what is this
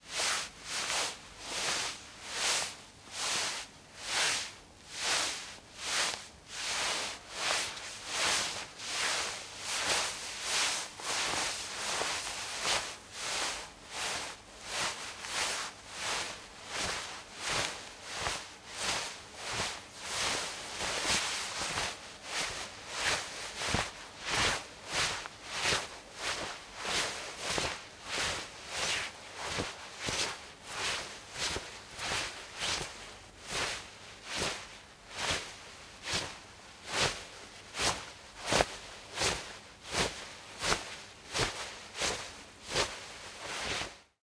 Cloth Rustle 2
Foley, Movement, Rustle